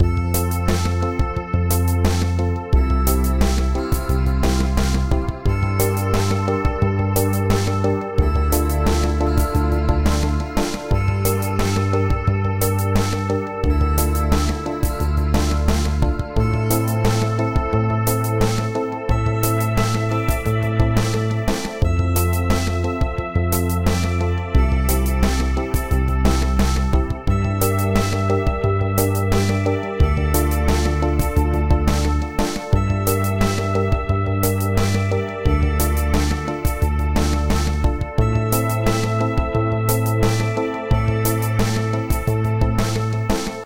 MUSIC LOOP001
loop of rhythm and instruments
bass, guitar, instruments, loop, rhythm